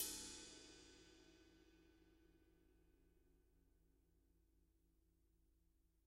Drums Hit With Whisk
Drums, Hit, Whisk, With